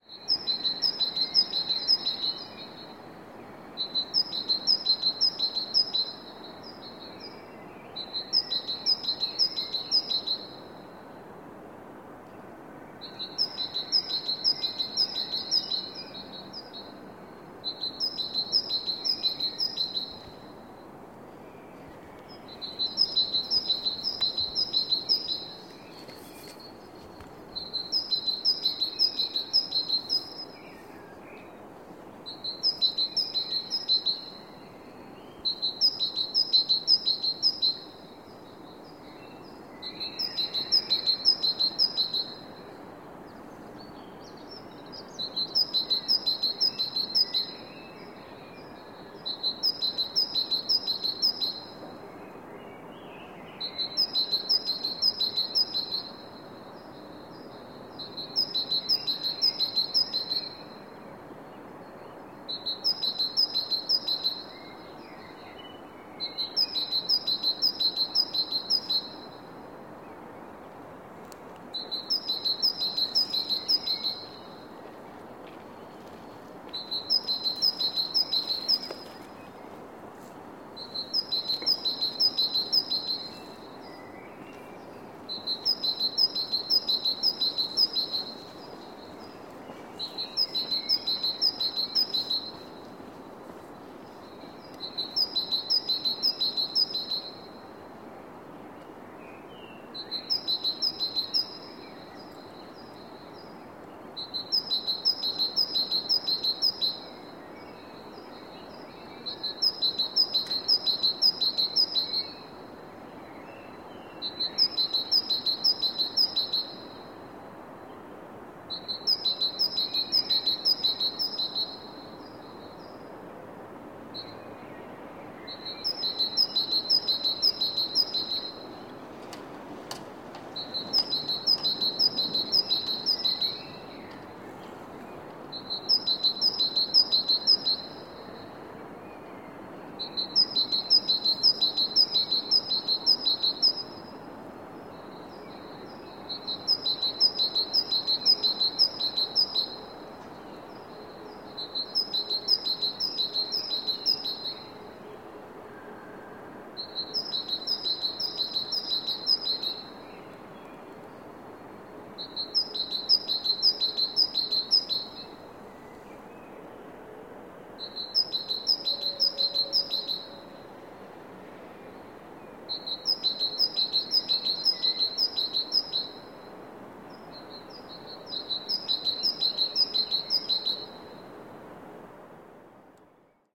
bird in the Hague at dawn 1
Bird singing in a city park of the Hague at dawn. Recorded with a zoom H4n using a Sony ECM-678/9X Shotgun Microphone.
Dawn 09-03-2015